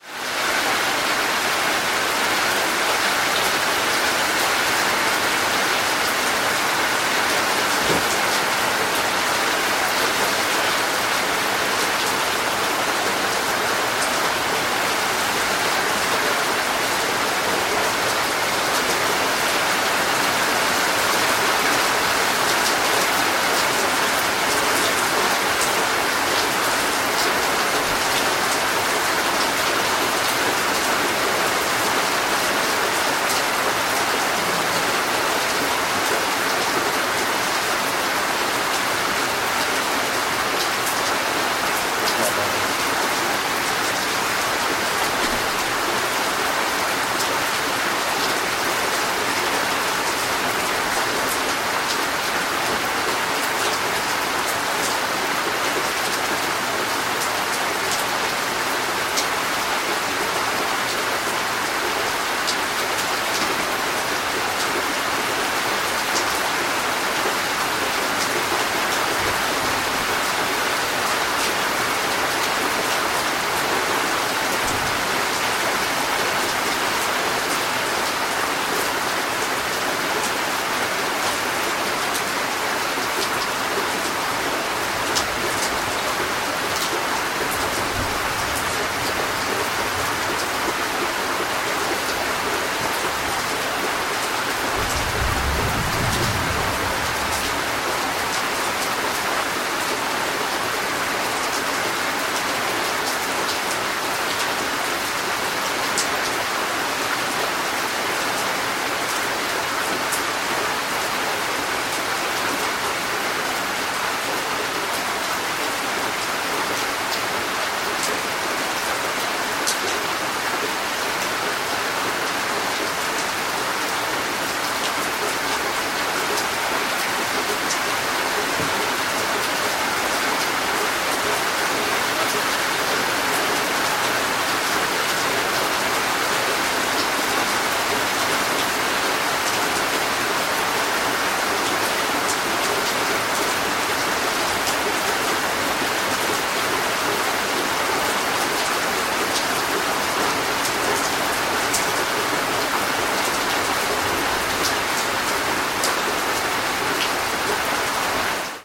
Ambience, Rain, Heavy, C
Raw audio of a heavy rain storm (with one instance of distant thunder at 1:34). This was recorded in Callahan, Florida.
An example of how you might credit is by putting this in the description/credits:
Raining, Downpour, Ambiance, Rain, Storm, Droplets, Heavy, Water, Torrential, Shower, Ambience